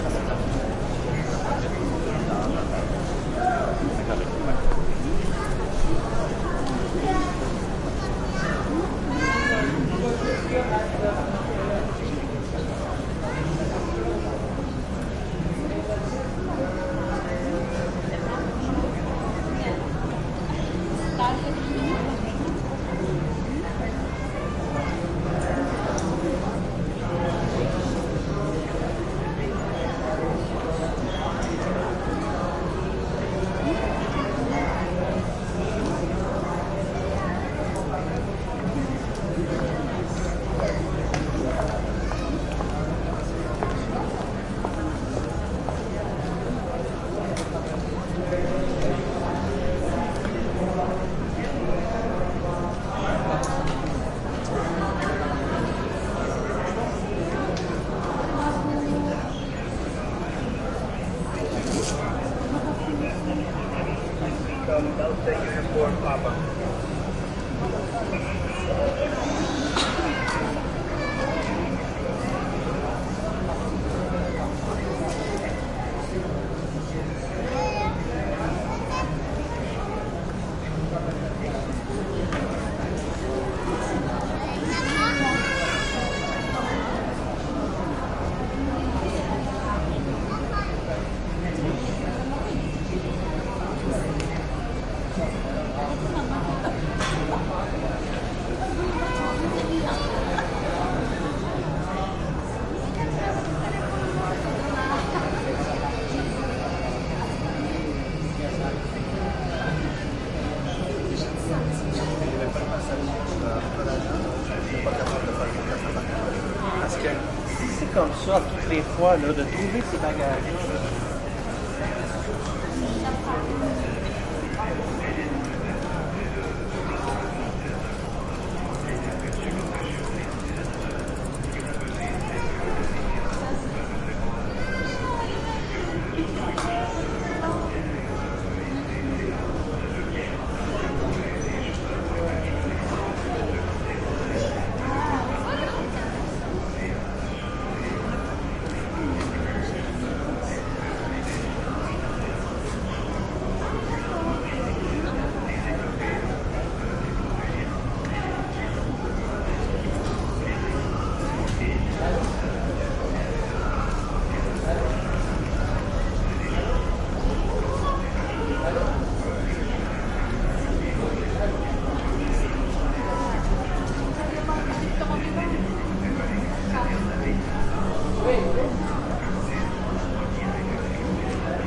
airport Dorval waiting area at gate calm crowd murmur activity people pass +security walkie on right
airport, calm, crowd, Dorval, gate, murmur, people